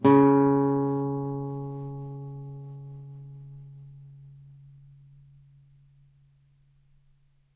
C#, on a nylon strung guitar. belongs to samplepack "Notes on nylon guitar".